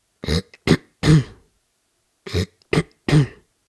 Cough slightly clear throat
A man is clearing his throat, harrumph
cough, clearing